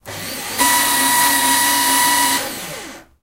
1 piece of paper being shredded by a cheap domestic paper shredder.